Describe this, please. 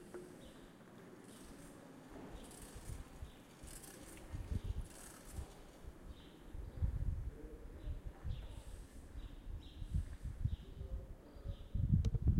Berlin bicycle passing - repetitive clicking sound , street ambience. Zoom H4n. Stereo.
city, field-recording